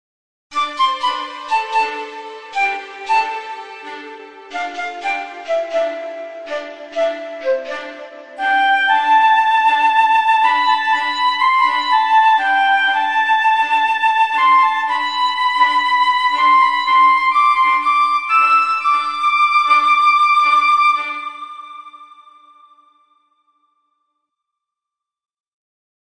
Momo's Bear
My little niece Momo Chan loves her little bear (child toy) so much , so I decided to compose a soundtrack that embodies the character of that little bear and give it to her as a gift. Sorry, because the soundtrack is very short, I hope you like it.
Criticism interest me in self development.
Thanks.
Red Rebel